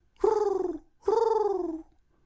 Bird - Artificial 4
This is an artificial bird sound, made with a human voice.